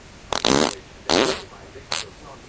fart poot gas flatulence flatulation explosion noise weird beat aliens snore laser space
aliens, beat, explosion, fart, flatulation, flatulence, gas, laser, noise, poot, snore, space, weird